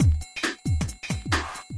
swinging drum loop with triangle using bitcrusher made with idrum demo 2004